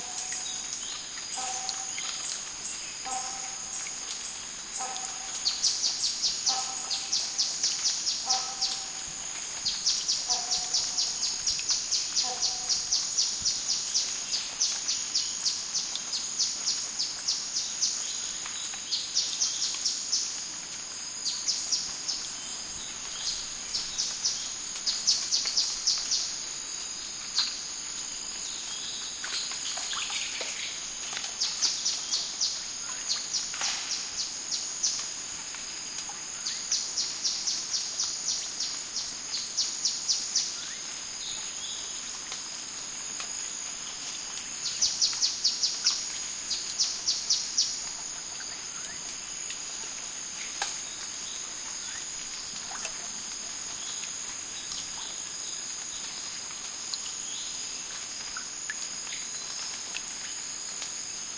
Thailand jungle morning crickets, birds echo +water drops on plants3 natural
Thailand jungle morning crickets, birds echo +water drops on plants natural
birds, field-recording, Thailand, water, drops, crickets, morning, jungle